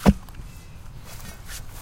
post in the ground
Dropping a large wooden beam into a hole in the ground
thud, ground, wooden, post